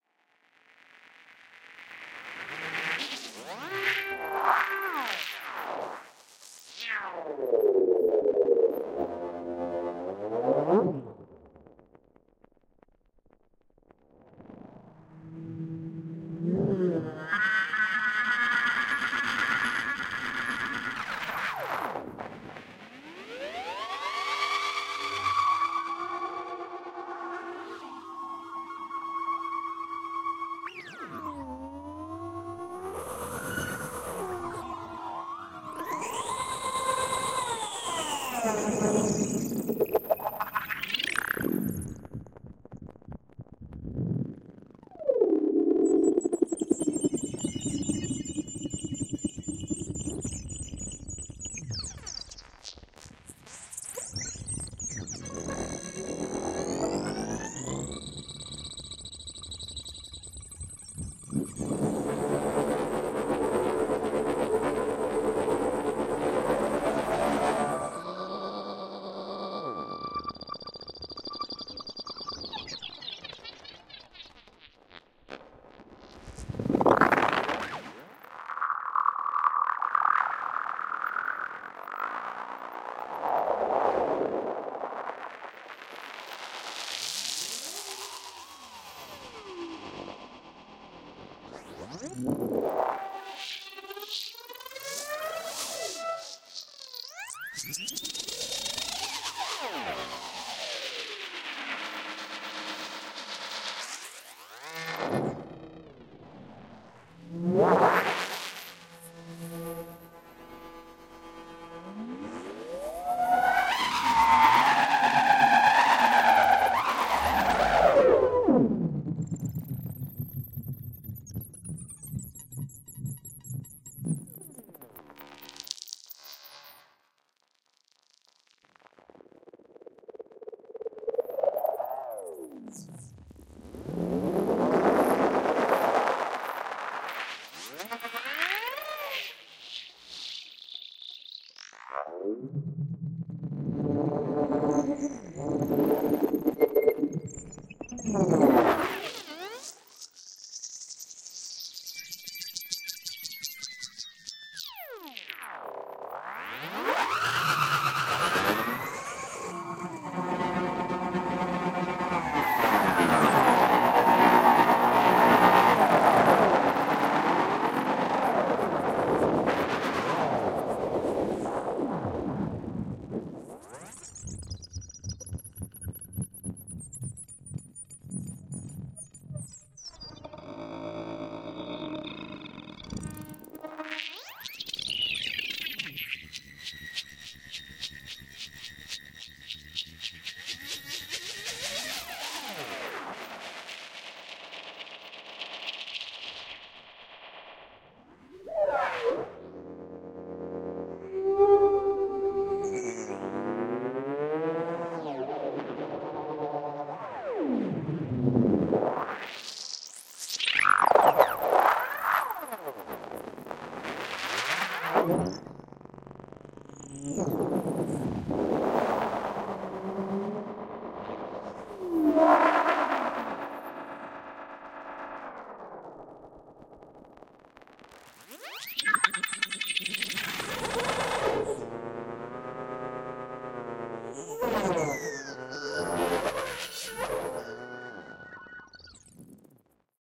ESERBEZE Granular scape 36
16.This sample is part of the "ESERBEZE Granular scape pack 3" sample pack. 4 minutes of weird granular space ambiance. Wonderful weirdness.